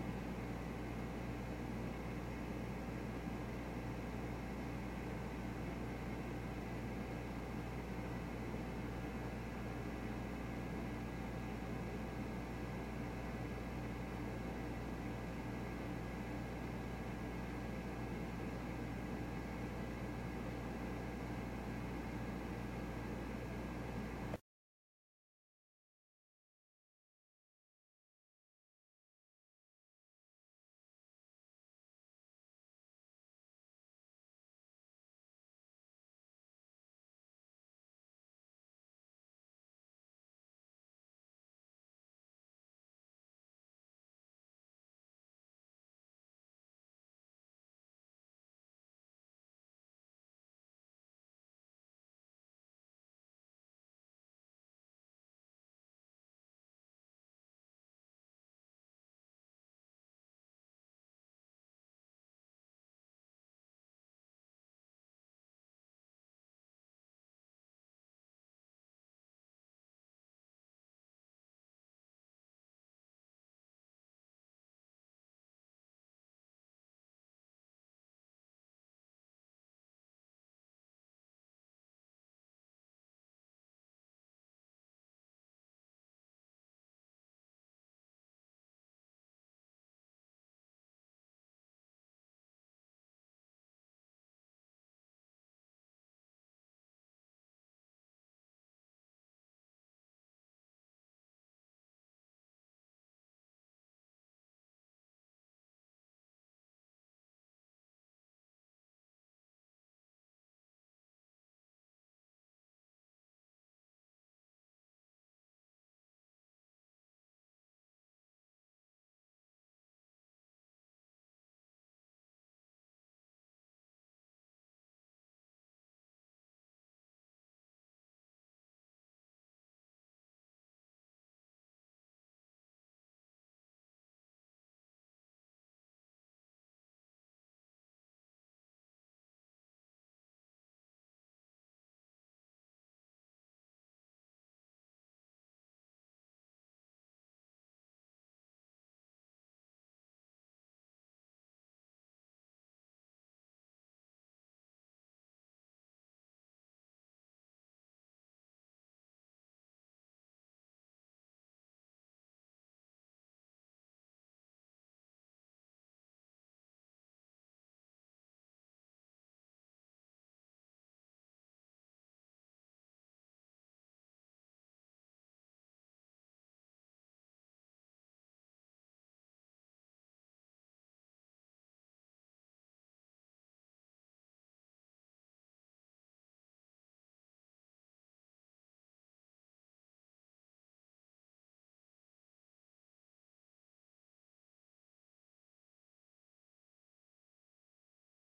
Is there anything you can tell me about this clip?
inside garage room tone